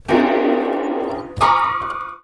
Detuned Piano Stabs 1
series of broken piano recordings
made with zoom h4n
anxious, broken, creepy, dark, destroyed, detuned, dramatic, eery, film, filmic, haunted, horror, macabre, noisy, out-of-tune, piano, soundtrack, spooky, suspense, thrilling, untuned, upright, upright-piano